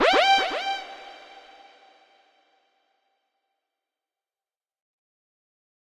The alarm that is being heard when a door to the shuttle bay is opened.
siren, alarm, klaxon, horn, emergency, important, alert, warning